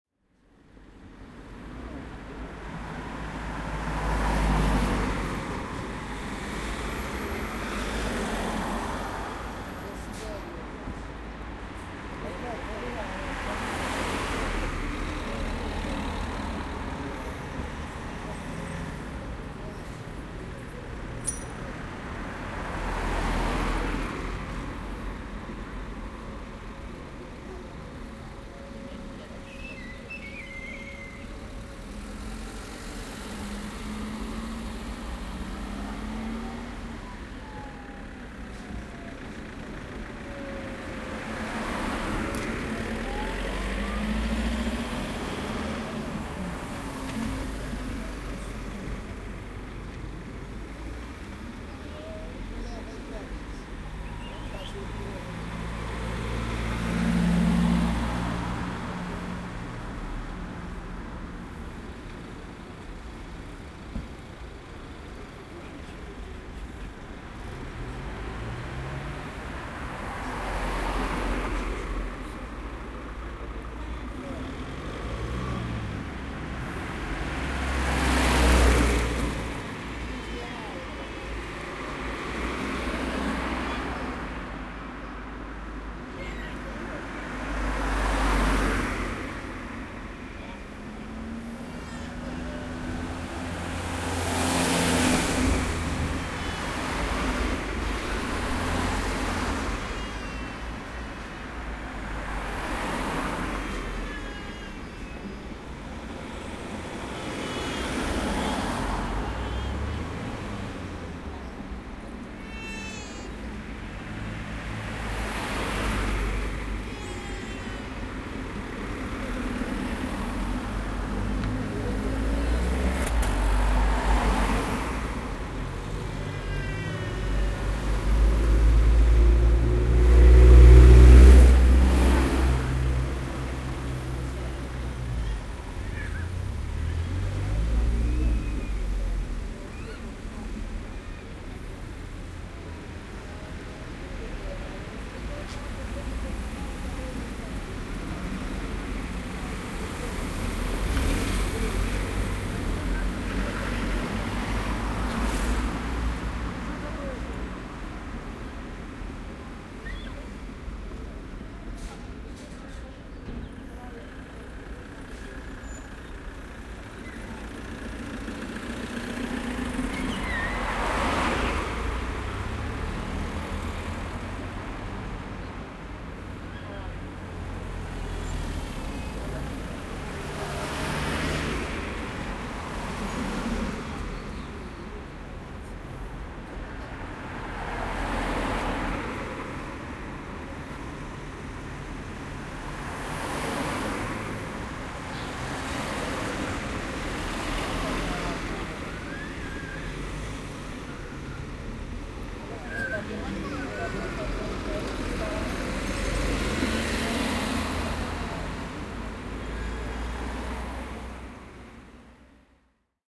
Traffic nearby
Traffic and city noises recorded with Zoom h4 in front of the road and without moving.
Cars, City, Film, Highway, Public, Road, Street, Traffic